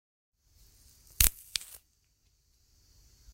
Something breaking
Sorry that the title isn't specific; it's a hard noise to describe. It's made by snapping a piece of watermelon rind in half, so it sounds like something softer breaking - possibly rotten wood for example.
break, rend, snap, soft, tear